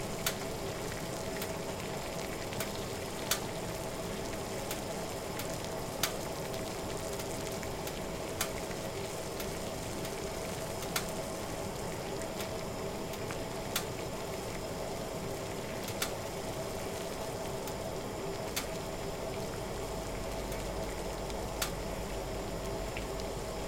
Water Boiling Mid
Boiling some water at middle-strength. Small bubbles emerging.
Cracking sounds from the oven also included. Easy to mix into a loop.
cooking, oven, bubbling, water, hot, high-quality, warm, cracking, kitchen, boiling, boil, mid